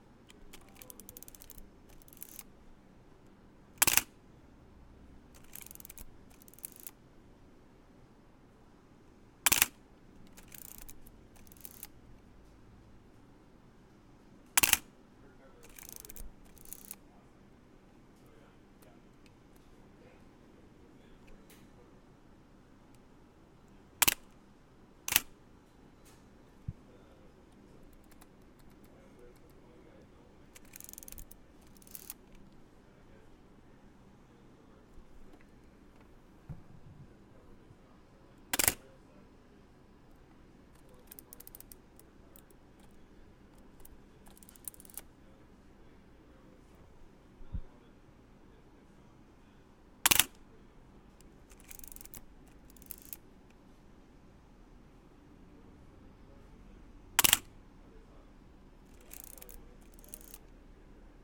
Shooting and winding a Pentax 6x7 medium format camera. It has a huge shutter mechanism and the whole camera moves when it fires.